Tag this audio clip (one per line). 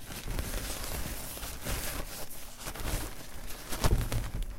flush
toilet
wc